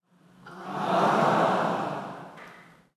crowd ahhhh
audience, crowd, group